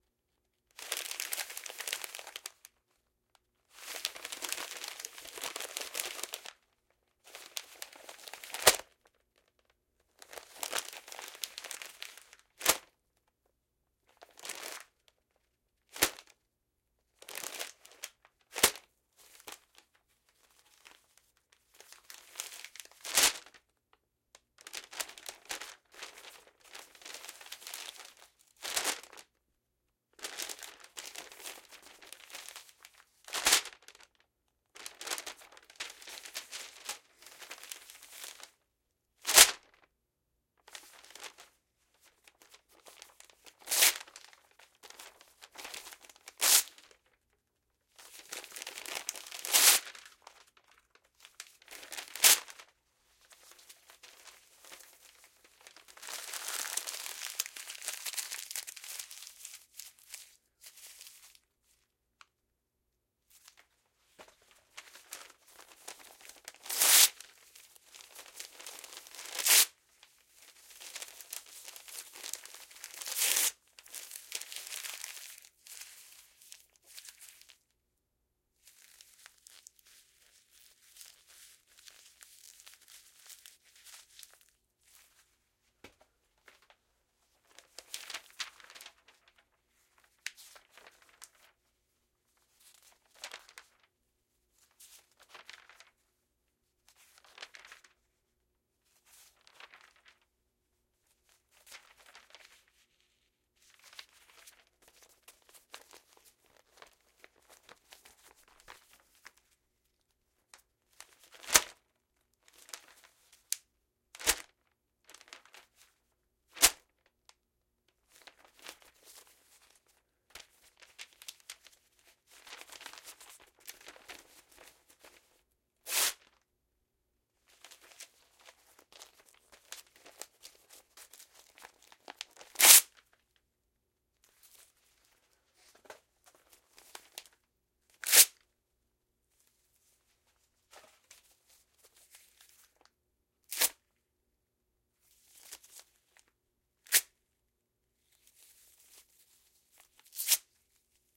Assorted paper sounds.
crumple, news, paper, rip